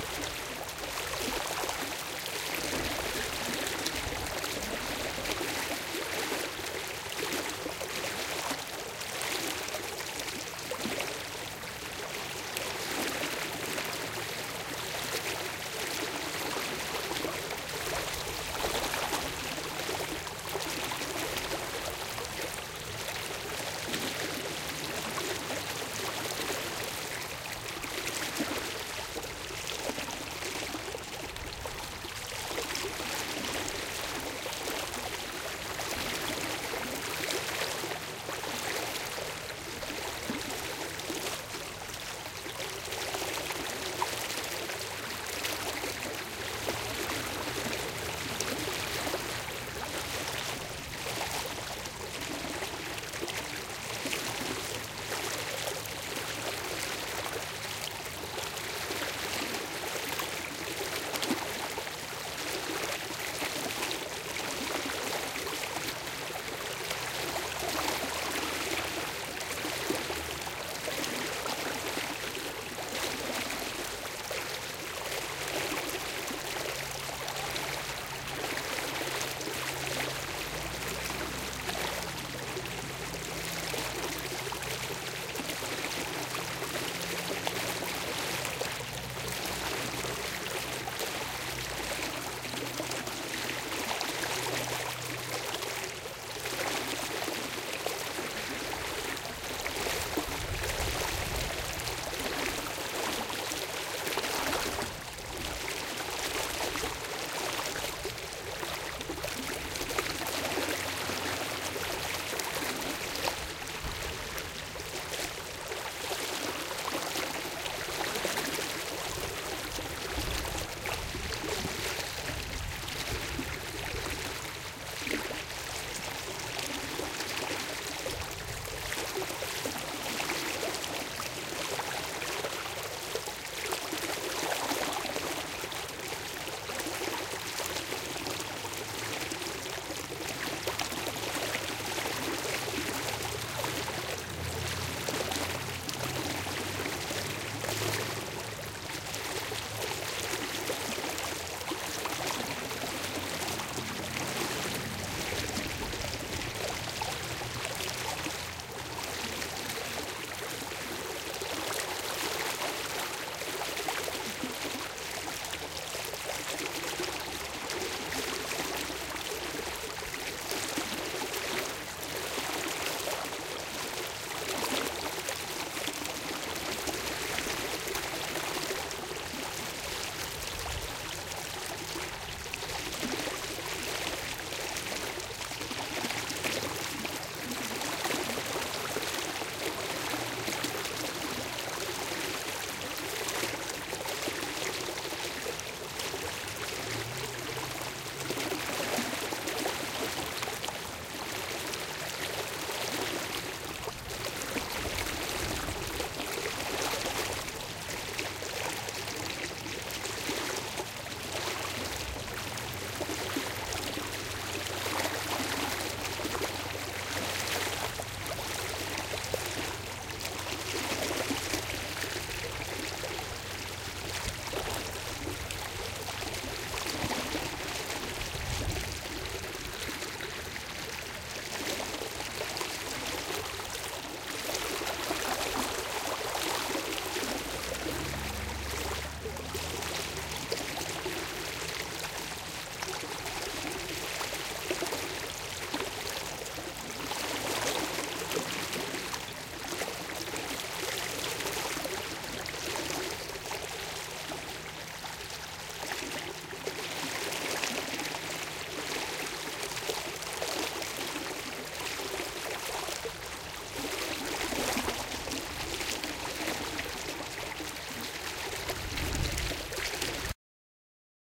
River Wandle - Shallow River Medium Flow
Recording of a medium paced shallow river made with a pair of NTG1s in XY arrangement into a Tascam DR-40. No processing other than a low cut to take off the worst of the rumbles and some editing to take off some bumps and gusts of wind.
Further notes:
There's a little traffic in the background. This is London.
The recording was made by hanging the mics by a rope over a bridge over the water - the panning, therefore, is allover the shop as they twist and turn all over the place.
It's not always very obvious but one of my cables was dodgy and every so often you can hear a quiet, high squeak, I think, because of this. Sorry.
field-recording, traffic